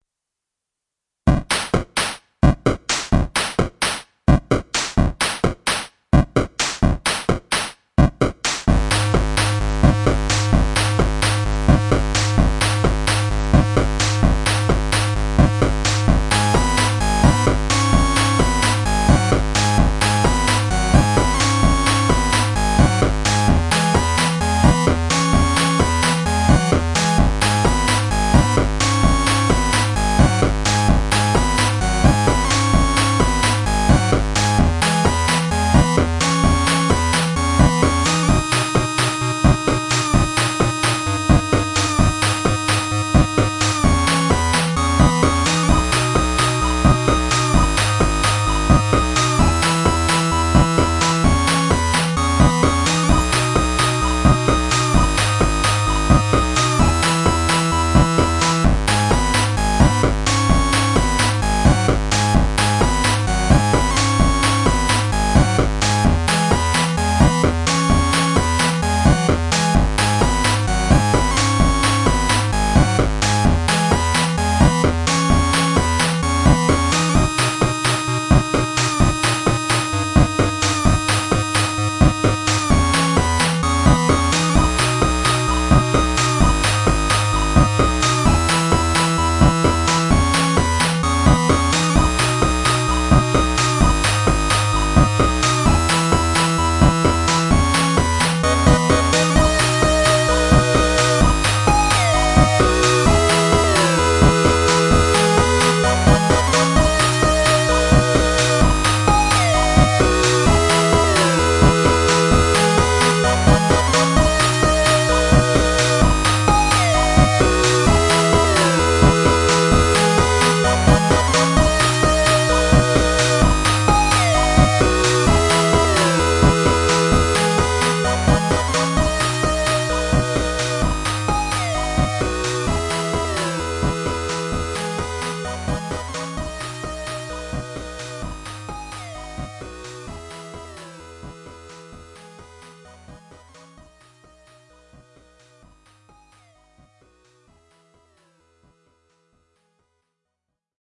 This recording is an organization of various loops created using ModBox.
The original ModBox project can be accessed and freely edited here:
(visible link has been shortened for ease of use, click the link to access the project)
This project is also re-purposed from a previous recording found here:
12112013_drumMachine_and_Synthesizer